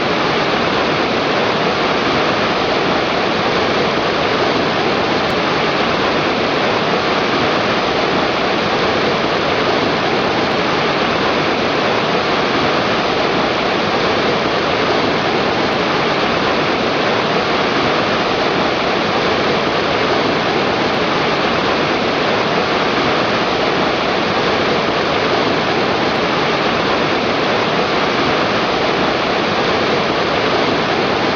Vic Falls
the locals call the Victoria Falls the smoke that thunders - listen to it and you know why....
Falls, thundering, Victoria